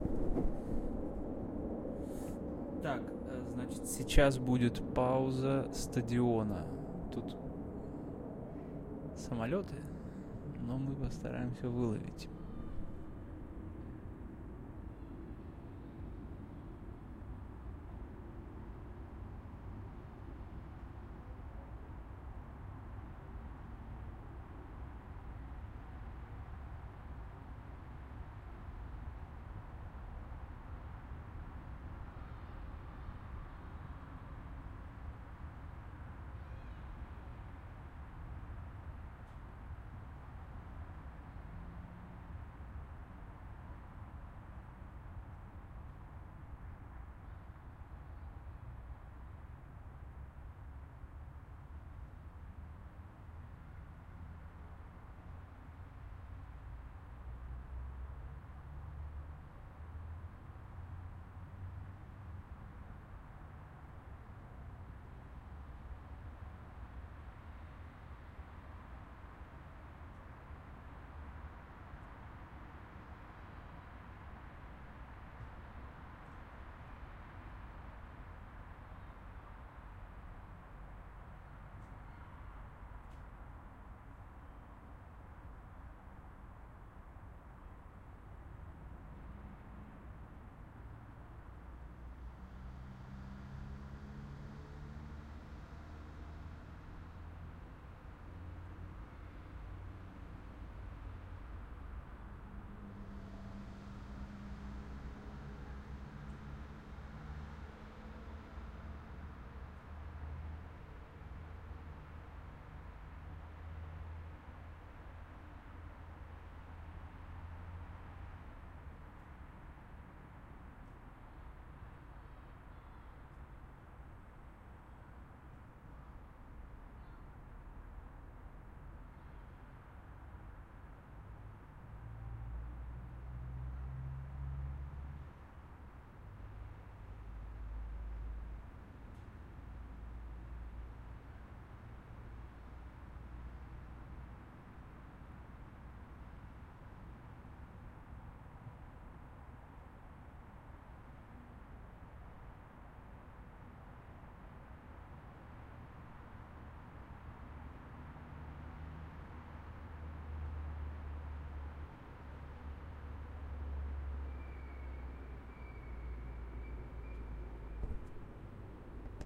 XY Stadium ambience
Night empty football stadium. Recorded in a special training-zone of russian footbal team.
Actually it just sounds like a distant city :)
(And my voice description in a begignning)
Device: ZOOM H6 (XY mic)
ambience, field-recording, night